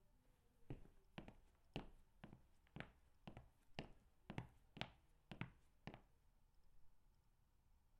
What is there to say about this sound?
Recorded with a Zoom H4n, on a wooden floor.
Floor, Wooden, Walking